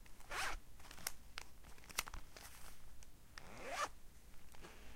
The zipper of the coin purse section of a wallet going back and forth.
coin-purse, wallet, stanford-university, zipper, aip09